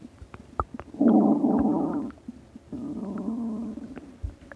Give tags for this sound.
body; bubble; digestion; groan; gurgle; guts; human; intestines; liquid; stomach

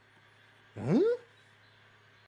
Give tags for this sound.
hmm; man

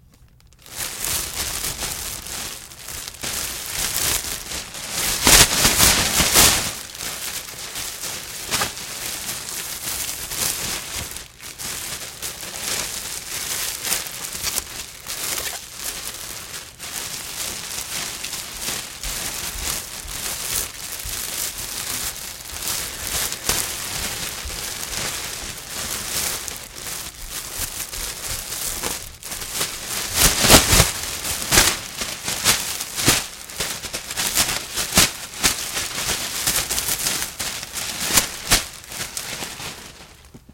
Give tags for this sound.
empty; bag; rustling; trash

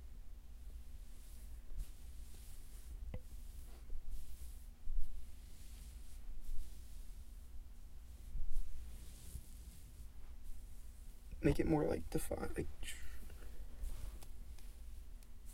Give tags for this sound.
hand; through; hair

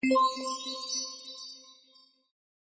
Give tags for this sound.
spell-cast; sfx; effect; cast; magic; spell

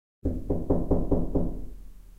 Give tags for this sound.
cavern; door; knocking; shy; strong; wood

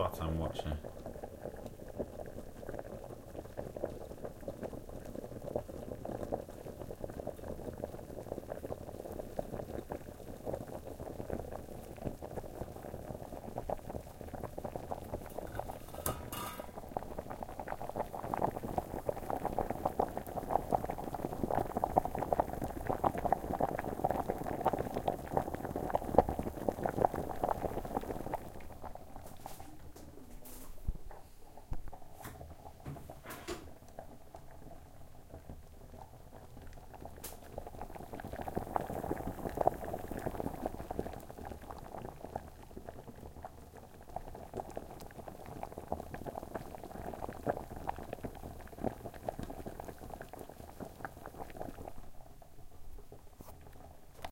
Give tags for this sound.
boil; boiling; bubble; bubbling; heat; hot; lava; steam; water

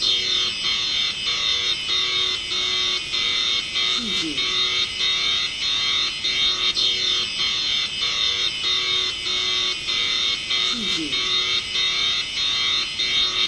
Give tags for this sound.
alarm; alert; atomic; blast; bomb; emergency; explosion; horn; nuclear; siren; storm; tornado

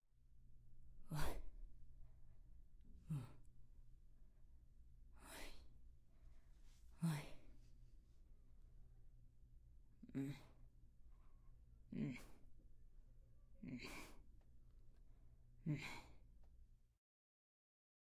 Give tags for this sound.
Discomfort; Woman

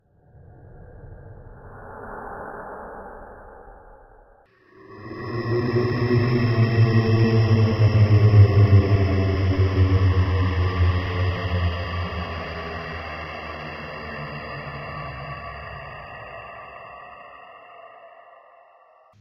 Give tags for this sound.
noise; ghost; horror

